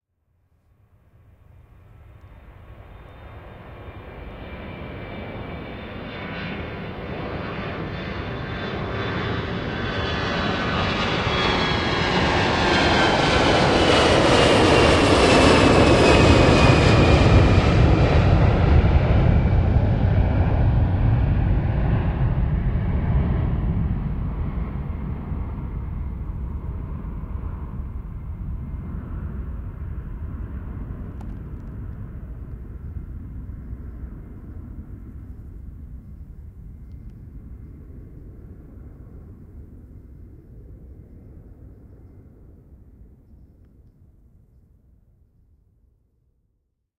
airplane stereo flyby 03
A nice & sunny recording session at the Airport! Positioned right at the flight path of departing planes, this served as a test recording for the Tascam DR-40X. At some point during the session I decided to point the recorder straight up, instead of towards the planes themselves, creating a nice stereo "pass-by" effect.